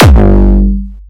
I'm not the best at hardcore kick drums mainly looking for feed back, i shall uploads ones i'm happy with
This one is a bit more hardstyle i guess not a massive fan of the genre so you'll probably make better use than me
Recipe
Rob Papen Raw Kick
bass, bassdrum, distorted, distortion, gabber, hard, hardcore, hardstyle, kick, kickdrum